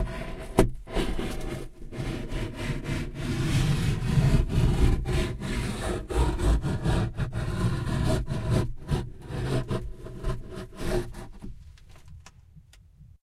rustle.box-fork 6
recordings of various rustling sounds with a stereo Audio Technica 853A